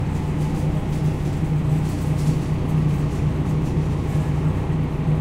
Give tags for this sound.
vent
field-recording
metal

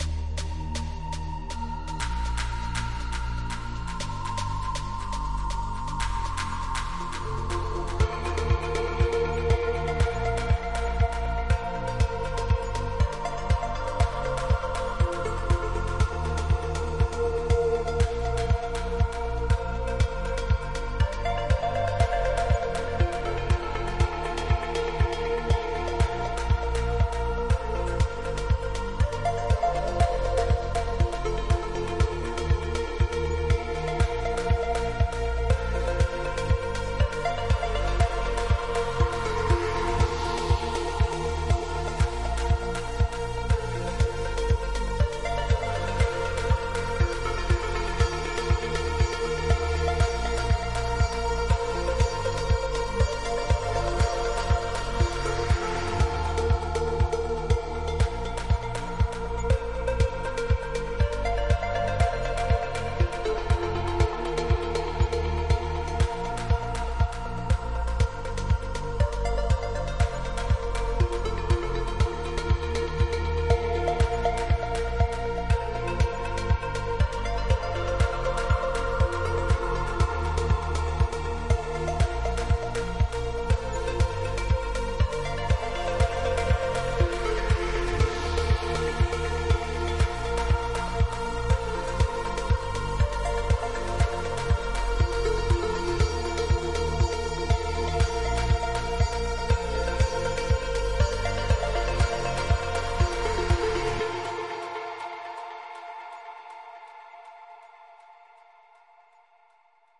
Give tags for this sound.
Dance
EDM
Music

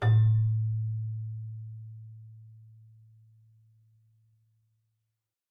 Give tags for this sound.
bell
celesta
keyboard

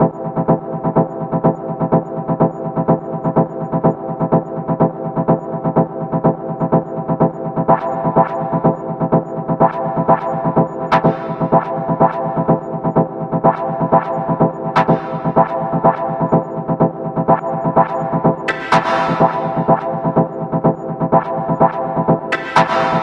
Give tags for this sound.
Delay
Echo
Rhodes-Organ
Rhythmic

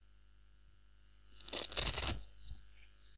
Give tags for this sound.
velcro
open

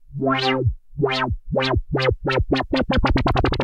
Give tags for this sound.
accelerating
synth
fat
mid
warm
bleep
chorus
fx
polysix
korg
analog
wah
thick

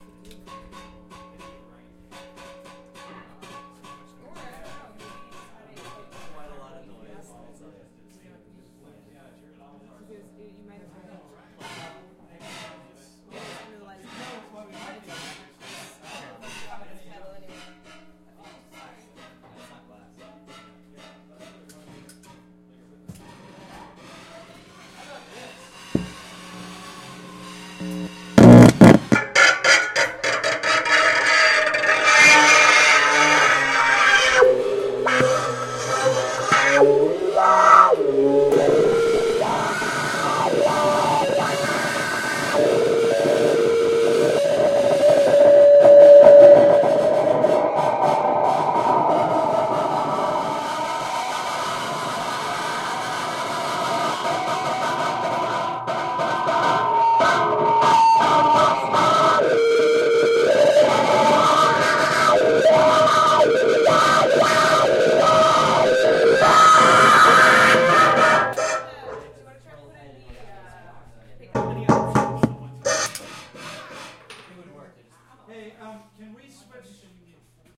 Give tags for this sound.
futurist
Russolo
Intonarumori

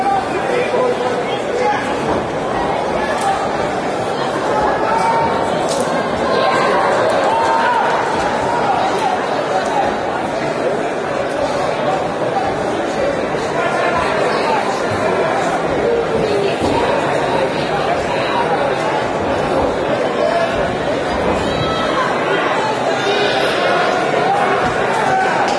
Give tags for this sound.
cheering
wrestling
boxing
field-recording
fighting
english
shouting
crowd
arena
clapping
fight
yelling
event
live

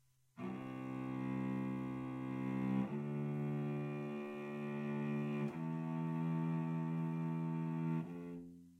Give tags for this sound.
classical
instrument
music
musical
musical-notes
notes
string
strings

